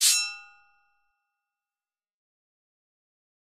Sword pulled 1
First take. Created with the Korg M1 VSTI. 2 oscilators, one playing a cabasa, the other playing a triangle fading in quickly. Got quite a deep texture of it resembling a large sword being pulled out of it's holder.